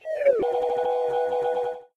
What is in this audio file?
Spam the Arcade button until it jams into an autofire :P